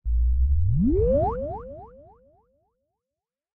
Space Flight Sound Effect
Synthesized space sound effect.